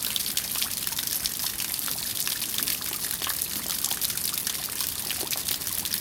jp drippage
The sound of rainwater flowing off of a parking structure and into a puddle, seamlessly looped
drip, dripping, drips, droplet, drops, loop, mud, nature, pattering, rain, water, weather